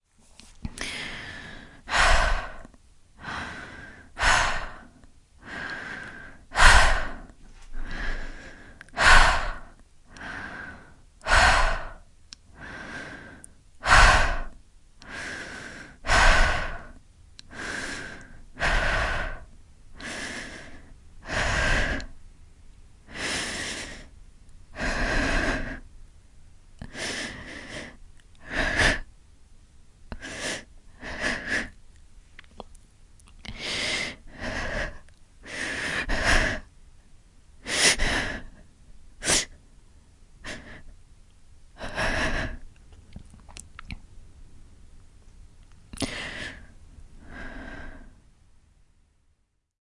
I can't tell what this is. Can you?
First, heavy breath, then cries of suffering.
Woman in pain.
Recorded with my AT-2035 and my Tascam.
September 2022